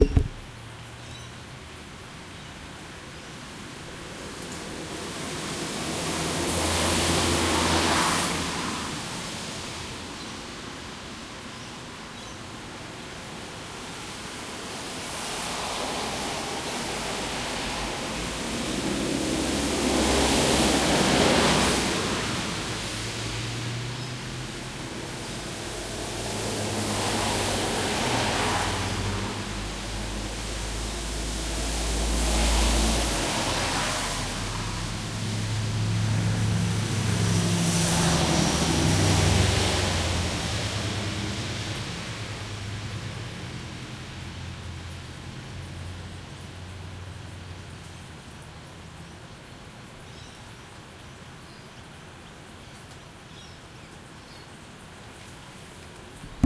cars driving on wet suburban street with ambience.